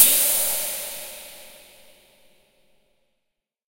HiHatOpen FromCoinSplatPlusAbox
This was for a dare, not expected to be useful (see Dare-48 in the forums). The recorded sound here was a handful of coins -- carefully reverberated and enveloped. The mixed sound was a hi-hat sound I created in Analog Box 2. A lot of editing was done in Cool Edit Pro. Recording was done with Zoom H4n.